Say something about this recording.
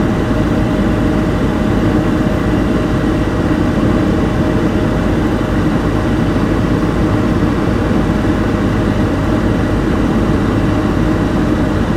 AMB-Freezer-Indside-Idle-01
This was recorded by putting an AT3085 shotgun mic inside a freezer pointing towards the back.
Ambiance, Refrigerator, Freezer, Motor, Appliance, Ambience, Machine